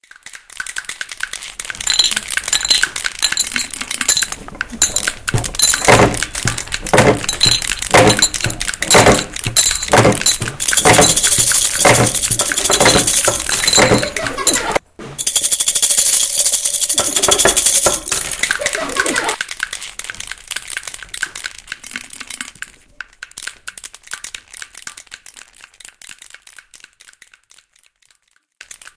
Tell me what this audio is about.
French students from
Léon Grimault school, Rennes used MySounds from Germans students at the Berlin Metropolitan school to create this composition intituled " The Party".